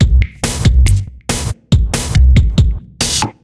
Drums loop 140BMP DakeatKit-02
made by Battery 3 of NI
drums, 140bpm, loop